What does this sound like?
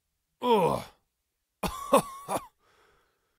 Male voice grunting and coughing after being punched or hit